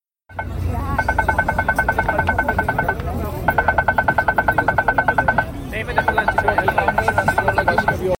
Tapping sign at crosswalk in NYC.
Stop, Tapping, Crosswalk